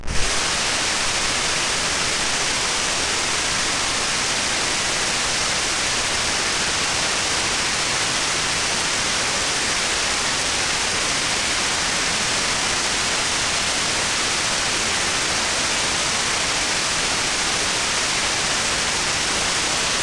This white noise sound was generated by the white noise
generator on the Audacity software. It has been compressed
and lowered in volume using the Dyson compressor.
hiss, faucet, fizz, relaxing